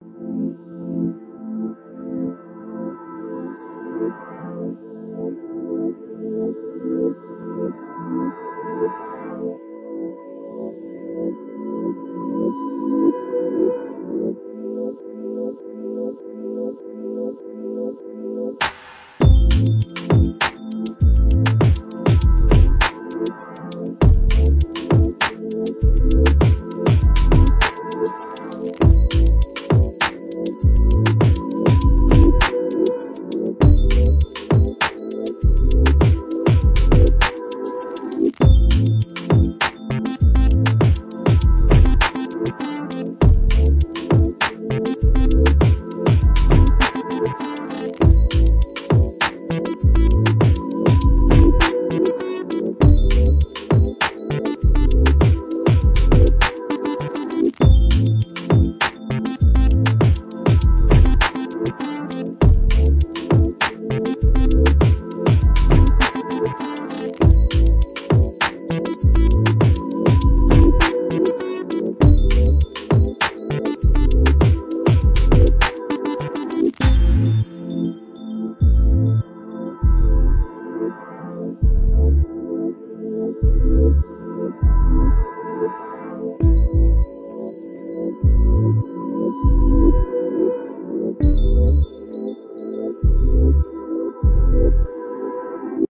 Thursday with blues
Another podcasty type beat.